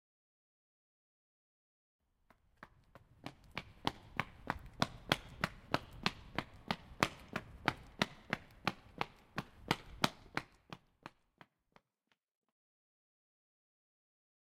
Sprint - Street
Sprinting on the street
Czech, Panska, CZ